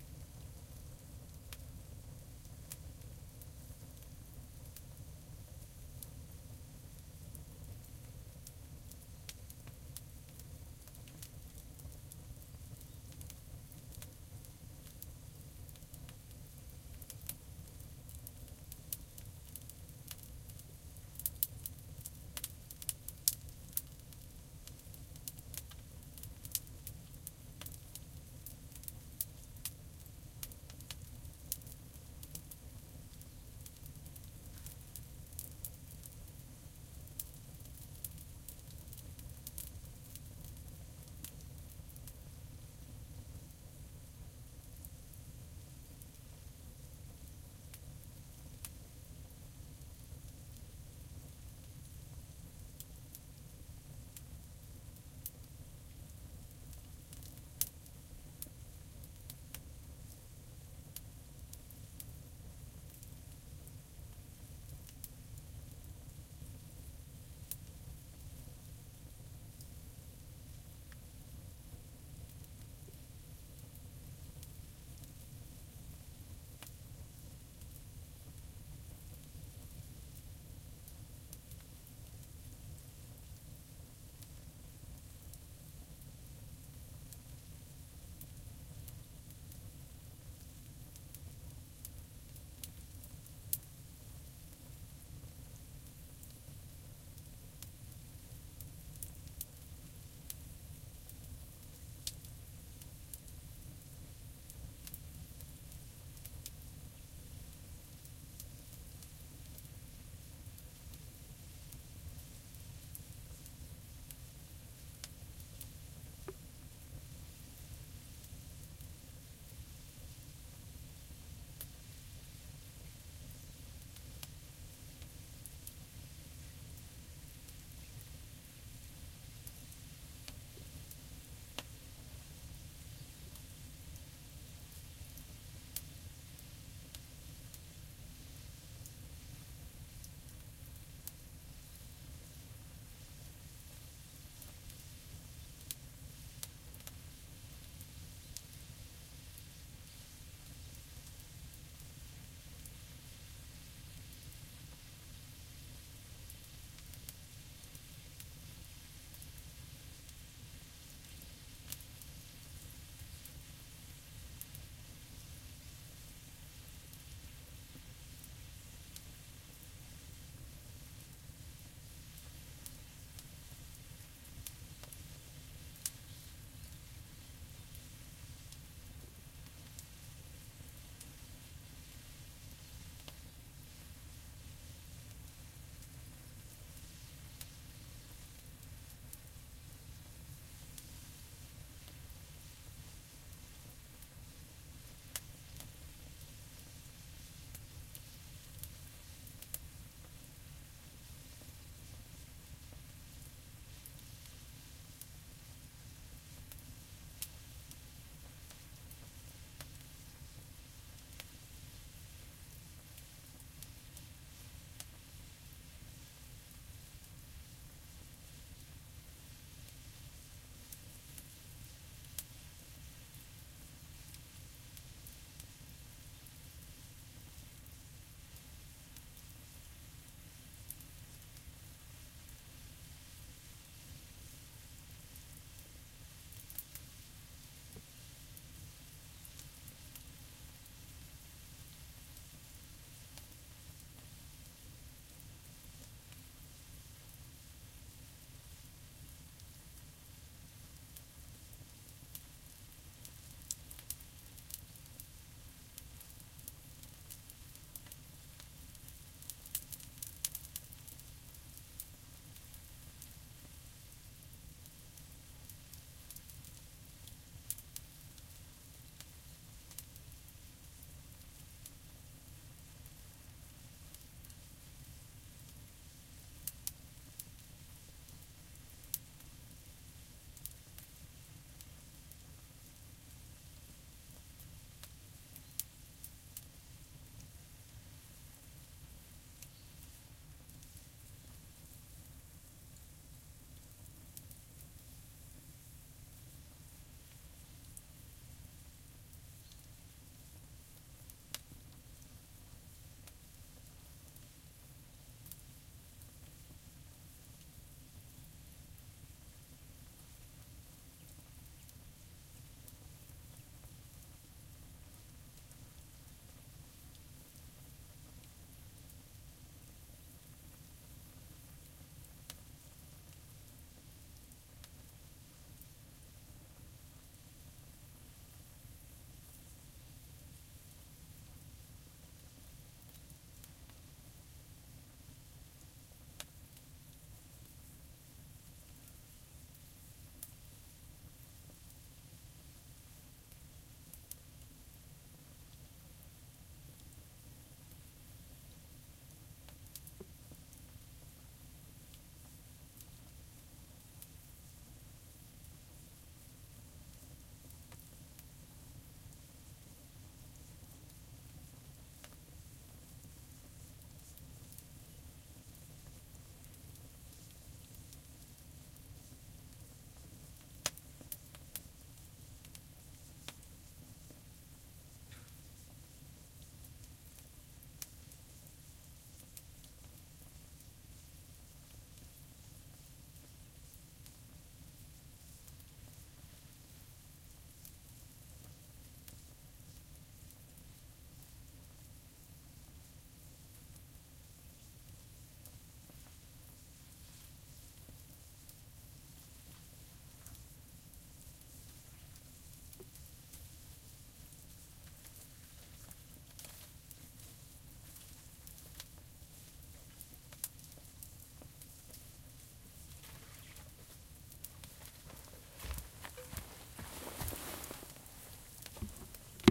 campfire in the woods front